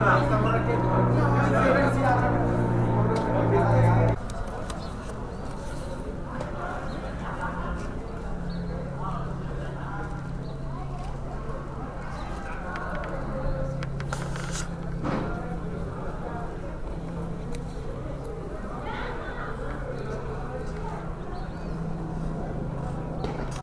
Ambient sounds made for my Sound Design class